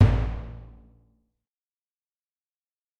A clean HQ Timpani with nothing special. Not tuned. Have fun!!
No. 2